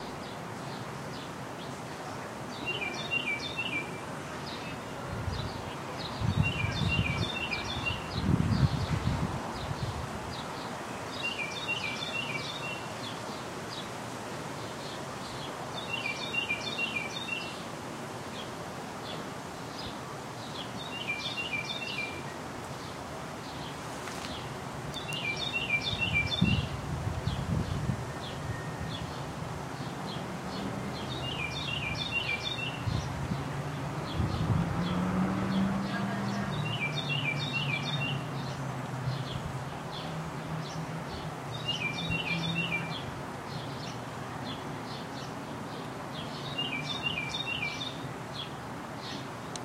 Summer day
i was out in the backyard and i had my camera